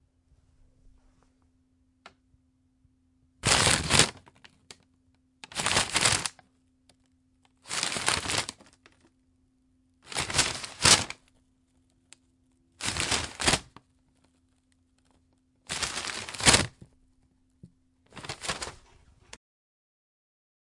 FX PaperBag 01
Crumply paper-bag sounds.
bag, crumple, paper